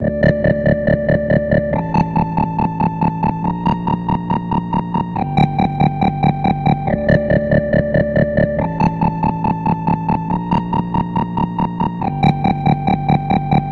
wobble doble2
Dub, Dubstep, wobble